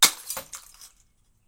Bottle Smash FF175
1 high-pitch, quiet bottle smash, hammer, liquid, bright sound